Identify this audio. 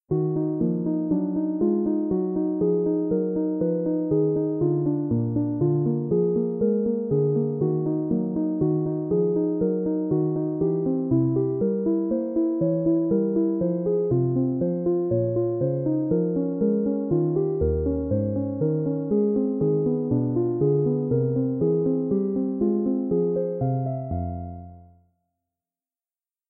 A short Bach-like improvisation I made with a digital piano sound from Fabfilter's 'Twin 2" soft synth. No structure to the bars, unpredictable and wandering.